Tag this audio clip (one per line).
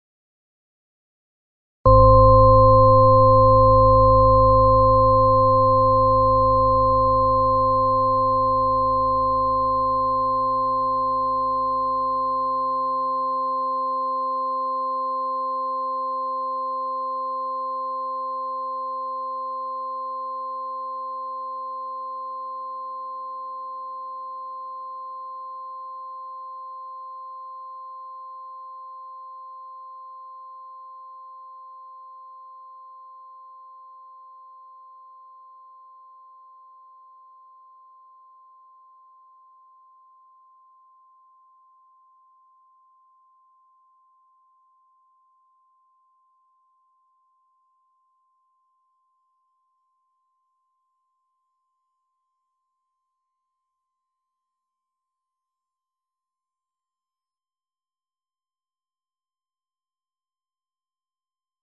bell
organ
pd